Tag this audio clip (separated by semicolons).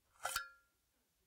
sword; blade; knife; scabbard; unsheath; shing